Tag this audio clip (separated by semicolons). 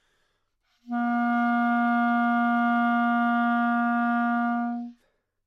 Asharp3; clarinet; good-sounds; multisample; neumann-U87; single-note